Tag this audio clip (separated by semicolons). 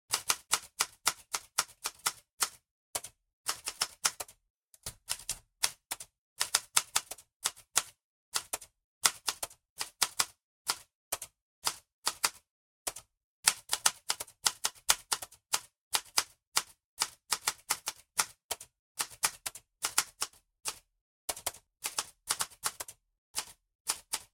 keyboard; letter; loop; recording; old; Schreibmaschine; keystroke; writing; writer; impulse; vintage; typewriter; type; write; dry; mechanical; key; office; typing; keystorke